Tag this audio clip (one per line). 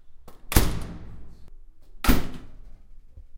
closing
bang-shut